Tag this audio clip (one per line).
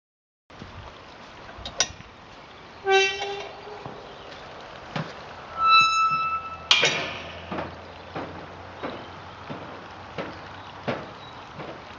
walking-away
metal-gate
cattle-gate
field-recording
gate-closing
squeaky-gate